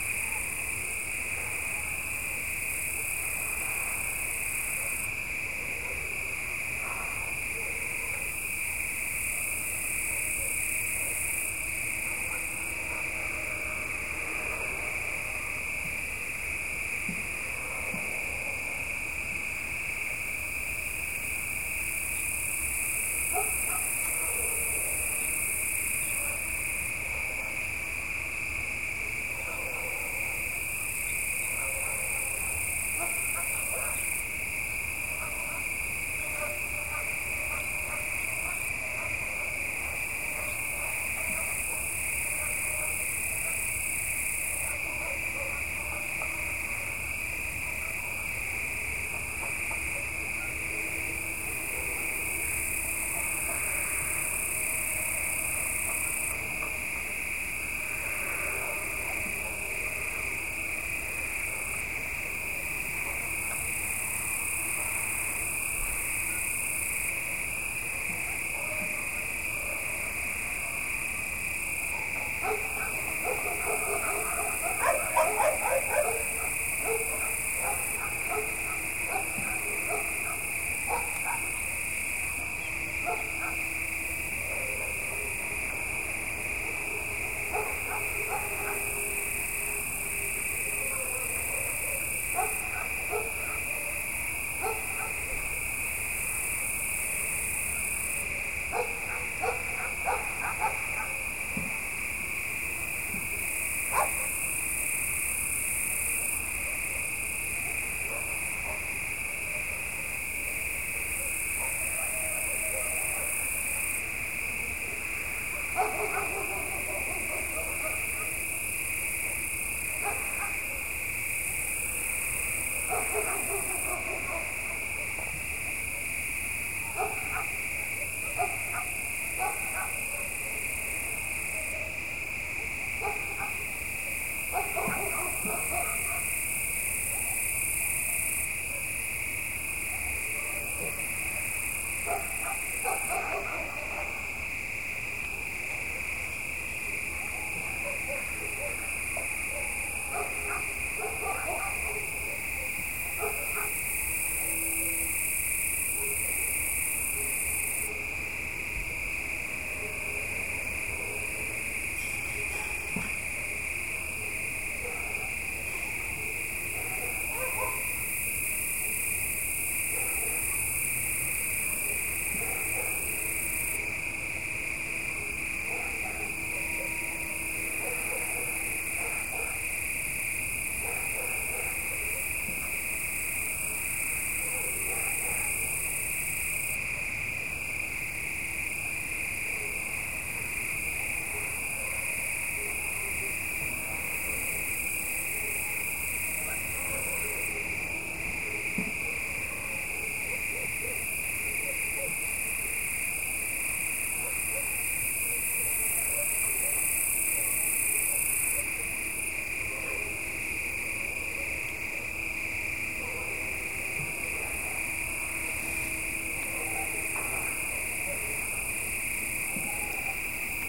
Regular silent night at my village in August. A lot of crickets, and far dog's barks.